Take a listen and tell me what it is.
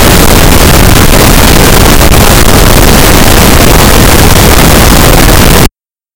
Scary static
Maybe a jumpscare